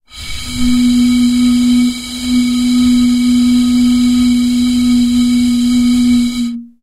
Blowing over the opening of a glass bottle.